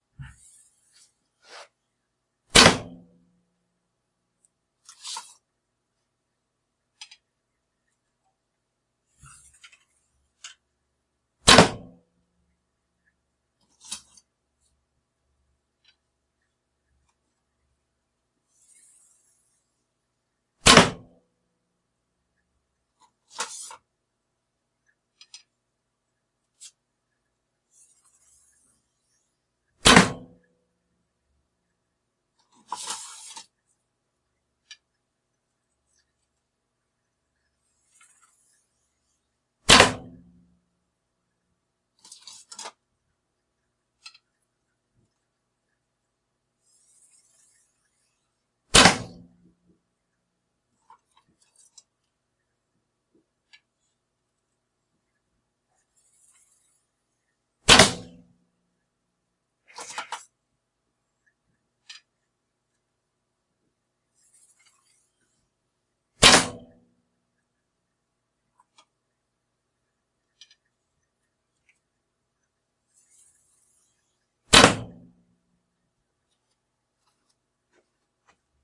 Razorback Archery
30# PSE Razorback bow with lightweight 1000 spine arrows. Shot 5 yards, in a basement range.
twang, loose, arrow, archery